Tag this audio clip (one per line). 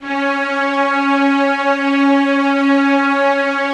2
c
multisample
strings
synth